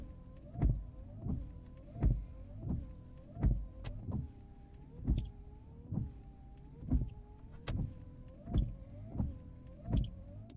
Peugeot 206 - Windscreen Wiper
car, 207, vehicle, peugeot